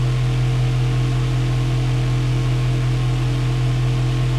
Fan, Exposed Bathroom
smooth yet prominent sound of exposed bathroom fan.
bathroom, hum